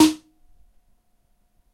a few kicks and snares synthesized a long time ago on a novation xiosynth 25, could be useful for sound-design and sample layering, they have an analog drum machine feel to it